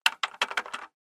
Pressing buttons plastic ones in a studio atmosphere with a Zoom H6.